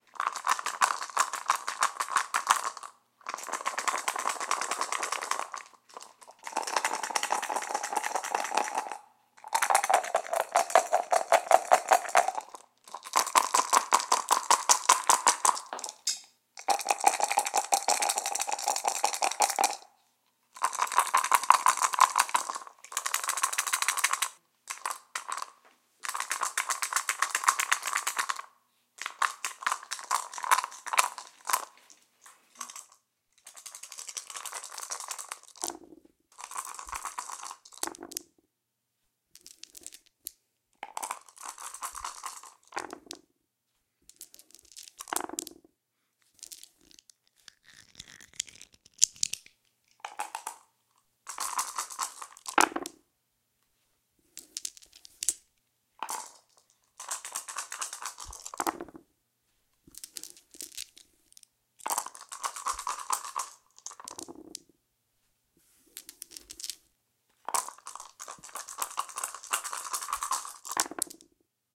shaking dice in a cup, high quality
dice, playing